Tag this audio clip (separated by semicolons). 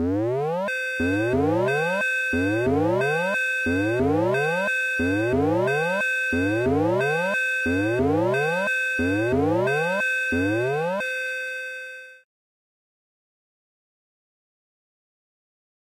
Alarm; Alert; Burglar; Emergency; Fire; Siren; civil; defense; disaster; federal; hawaii; honolulu; hurricane; modulator; outdoor; raid; tornado; tsunami; warning